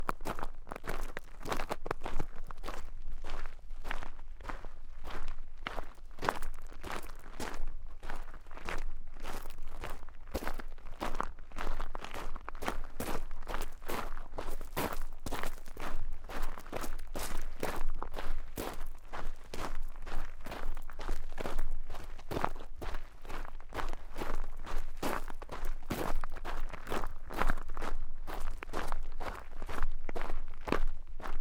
Long Walk Gravel Footsteps Slow and Fast
I recorded myself walking, and jogging on gravel.
Equipment used: Sound Device 552 and Sennheiser MKH50
footsteps, Gravel, jog, walk